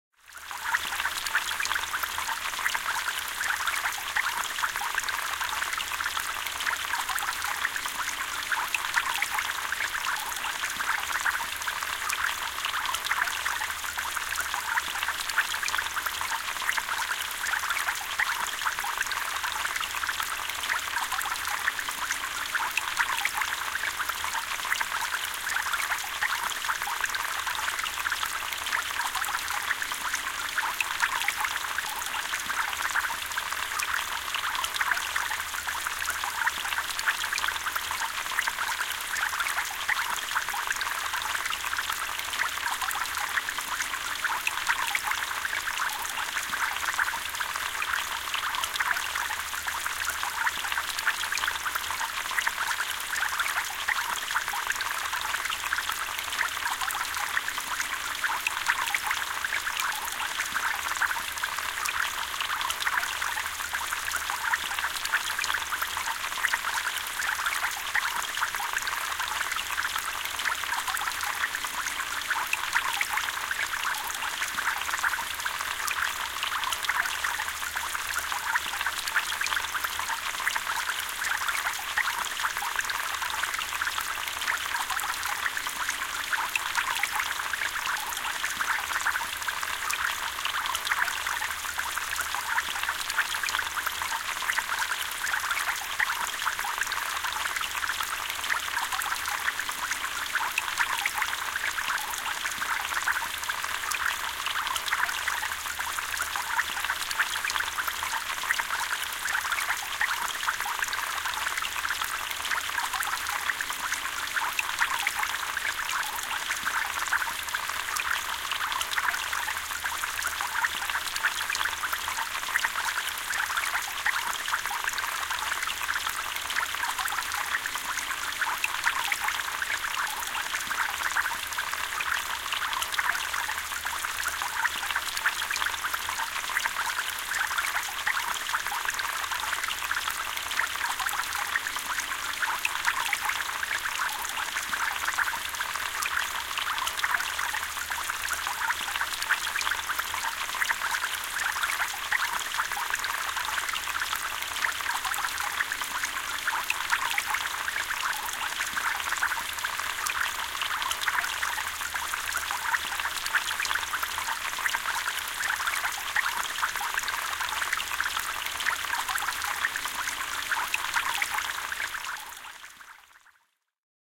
Hiljainen, tasainen sade veden pintaan. Lähiääni.
Paikka/Place: Suomi / Finland / Lohja, Retlahti
Aika/Date: 09.09.1997